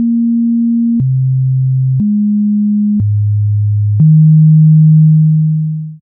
MAIORE Cédric 2015 2016 closeEncounters
Five synthetic sounds of 2 octaves with a different balance (except for the last one). Fading out for the last note wich is more longer and one octave under.
Typologie : N’’
Masse : Groupe de sons, groupe tonique (5 accords)
Timbre harmonique : Terne, constant
Grain : Parfaitement lisse
Allure : Régulière, continue
Dynamique : Abrupte
Profil mélodique : Scalaire, notes découpées